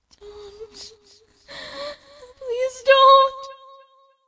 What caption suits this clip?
crying: don't please don't
voice, dare, scared, crying